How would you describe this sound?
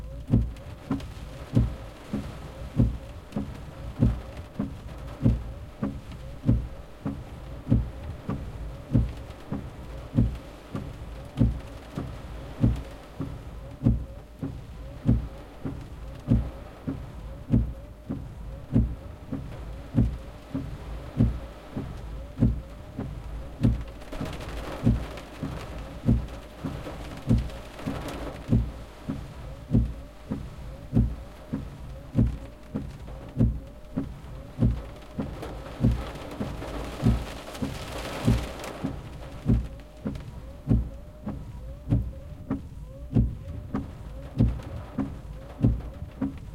Windscreen Wipers in the Rain Loop (3)
Fully Loopable! Rain and wind with wipers on a fast speed recorded inside a car.
For the record, the car is a Hyundai Getz hatchback.
The audio is in stereo.
pitter-patter, ambient, ambience, automobile